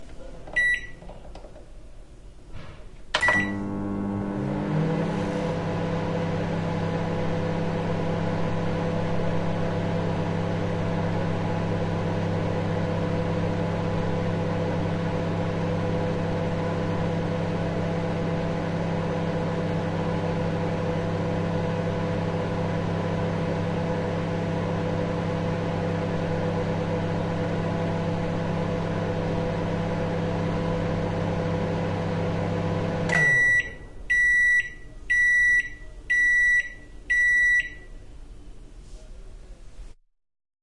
Microwave oven noise.
Information about the recording and equipment:
-Location: Home kitchen.
-Type of acoustic environment: Small, diffuse, highly reflective.
-Distance from sound source to microphones: Approx 0.6m.
-Miking technique: Tilted ORTF.
-Microphones: 2 M-Audio Pulsar.
-Microphone preamps/ADC: Echo Audiofire 4 in stand alone mode (SPDIF out).
-Recorder: M-audio Microtrack II (SPDIF in).
Eq: Compensation only for the response of the microphones (compensation of subtle bass roll-off).
No reverb, no compression, no fx.